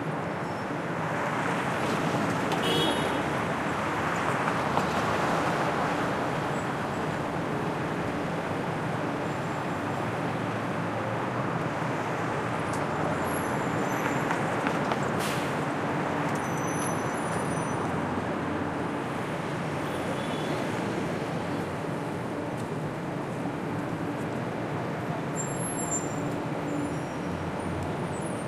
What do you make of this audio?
Mid range field recording of the area in front of the New York Times building in New York, taken from opposite the building on the corner of 8th Ave and West 40th St. Cars can be heard driving by, horns are honked, brakes are trodden etc. A sweeper vehicle features sometimes.
People can rarely be heard, as the recording was done at about 6 AM on a Saturday morning in March 2012.
Recorded with a Zoom H2, mics set to 90° dispersion.
ambience, ambient, busy, field-recording, horn, noisy